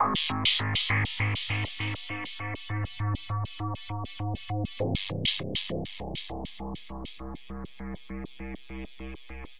100 Dertill Zynth 02
synth, bit, crushed, dirty, digital